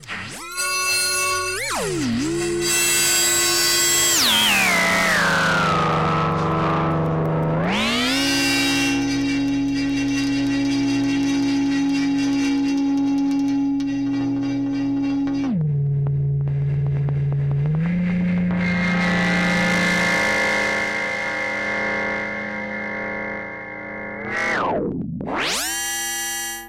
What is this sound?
Ya Weirdo
Kinda weird sound for the Morphagene. No splices set. Great live sound.
weird, morphagene, styled, strange, mgreel, synthesized